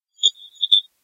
Sounds like a cricket but it's digitally created.